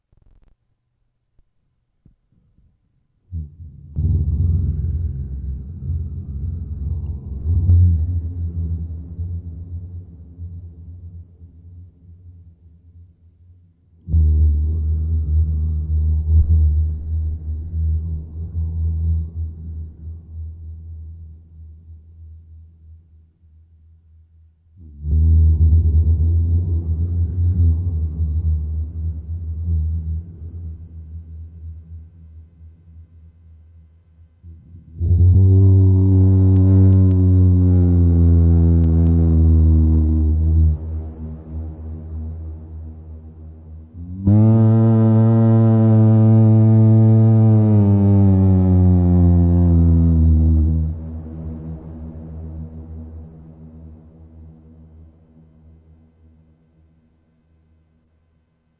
Dragon wakes up
Deep in the Midgard Mountain is a dragon wakening up. You are far from him. the sound has travelled kilometers.